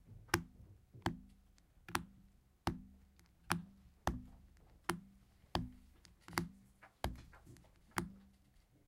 Mysounds LG-FR Arielle-small pocket
Sounds recording from Rennes
France, CityRings, Rennes